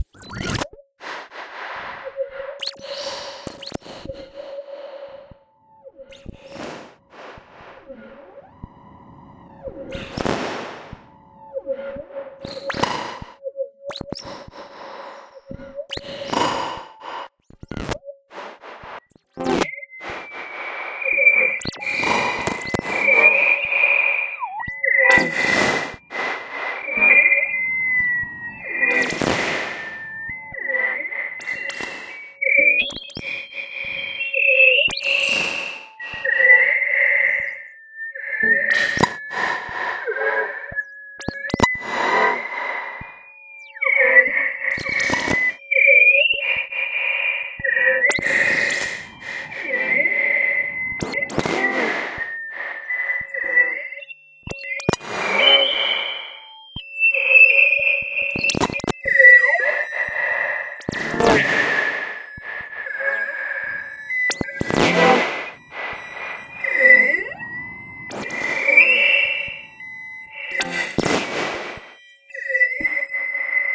starObject Resosnork
Careless asteroid whispers in the dark (of space).
abstract, sound-effect, sci-fi, sound-design, fx, effect, space, sfx, resonant, atonal, experimental, synth